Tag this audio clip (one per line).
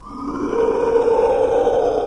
groan
monster